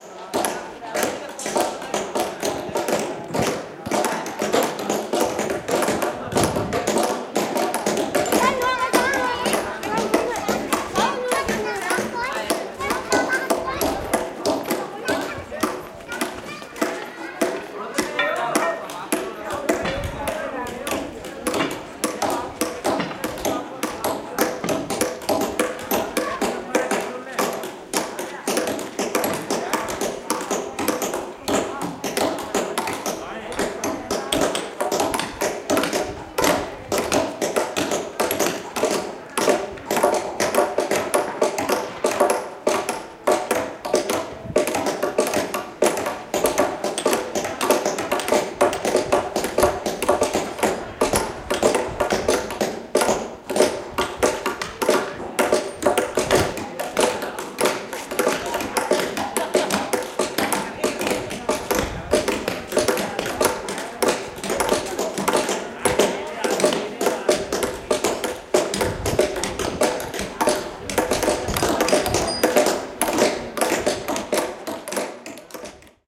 Masons are recorded here in the act of restoring the bright red sandstone stonework of Jodha Bai's palace in the ancient abandoned city of Fatehpur Sikri (1570), Uttar Pradesh, India
A UNESCO World Heritage site, the city was abandoned around 1585 due to a general lack of available water in the area.
mini-disc, A/D, sound forge process